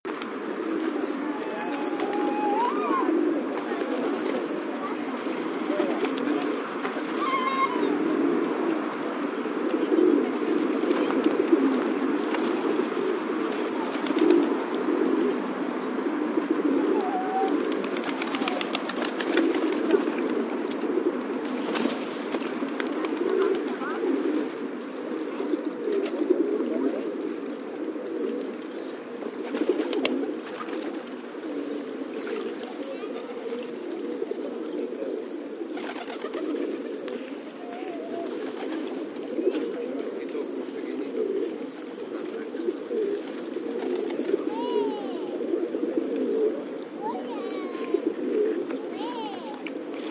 park ambient. Pigeons feeding and flying, human voices of kids and adults /palomas comiendo y volando, voces de niños y adultos